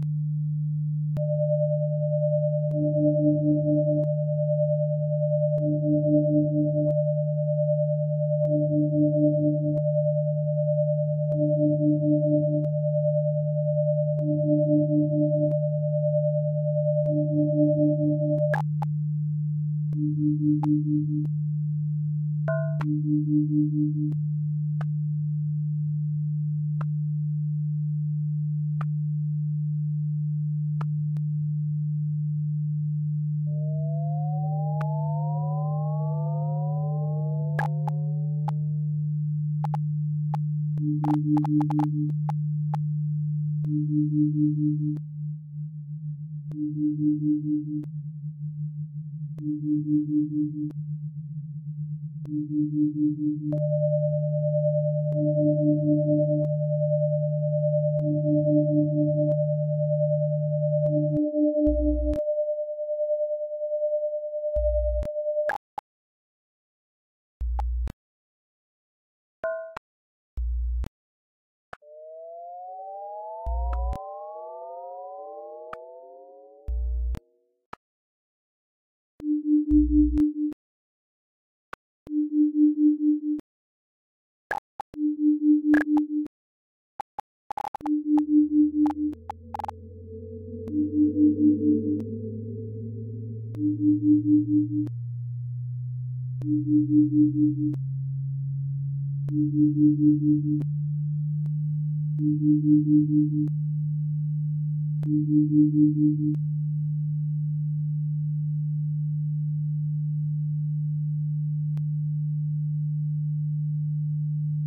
Miscellaneous electronic whirring.
Generated and Edited in Audacity
electromechanics, sci-fi, background-noise, computer, electronics, robotics, electronic, beep, artificial, machine, whirring, synthesizer, beeps, fx, digital, ambience, robot, ambiance, effect